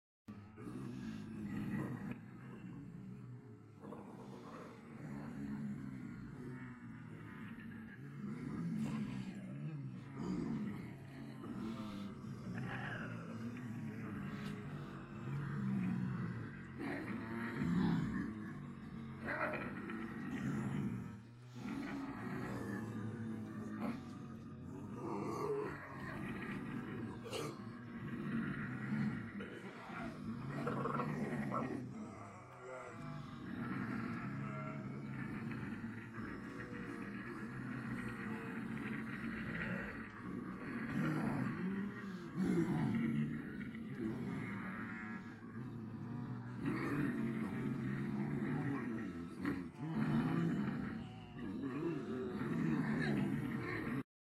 Multiple people pretending to be zombies, uneffected.